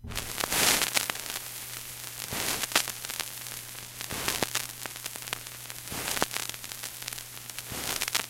voodoo LP static
Scratchy Voodoo loop recorded in cool edit with ION USB turntable.
vinyl, loop, noise